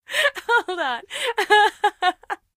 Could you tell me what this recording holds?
Adult Woman Tickled 'Hold on'! hahahaha Authentic Acting
Authentic Acting of Laughter! Getting Tickled to the point that you can't speak. Check out our whole pack :D
Recorded with Stereo Zoom H6 Acting in studio conditions Enjoy!
humor, tickled, getting-tickled, hilarious, human, female, voice, acting, happy, woman, tickle, lady, Funny, chuckle, vocal, Laughing, tickling, Laugh